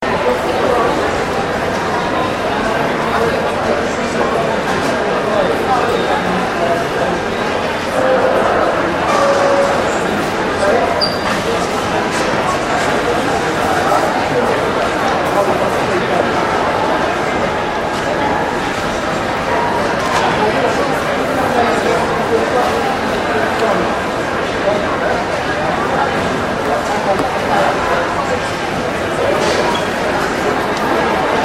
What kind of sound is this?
Ambience, Mall, A1
About thirty seconds of raw background audio taken from the Friary shopping mall in Guildford, England.
An example of how you might credit is by putting this in the description/credits: